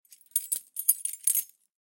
Keys Jingling 1 2
Design, Door, Foley, Jingle, Jingling, Key, Keys, Lock, Rattle, Real, Recording, Sound